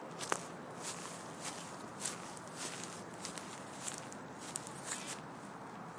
Dry Footsteps
Walking on dry grass and dry leaves in late June. Recorded with a zoom h5.
crunch, dry, dry-leaves, footstep, footsteps, grass, leaves, steps, walk, walking